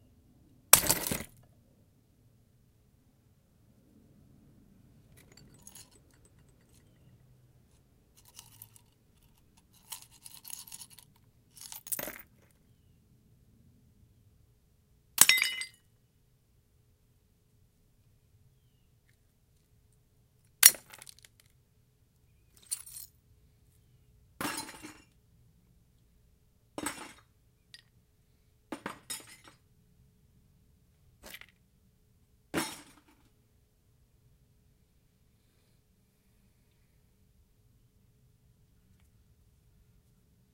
The large bottle after being slightly shattered in a few pieces being dropped and then thrown into a plastic bucket.